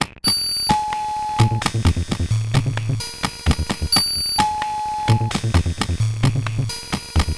electro loop
loop made with 4 instances of mr. alias pro custom built patches in Renoise